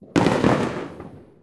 Burst of aerial rockets